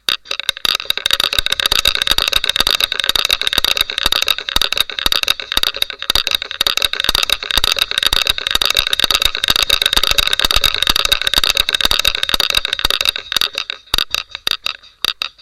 This toy consists of a green painted wooden plate, on which is mounted four hens. Part of the hens is connected to a red wooden ball that is hanging vertically under the center of the green plate. The later also got a handle. When you make a rotating move, the red ball swings, and four thin cords transfers the move to the hens, that picks up pretended seeds from the ground (the green plate). Assume this was a popular toy decades ago, for kids under 4 years.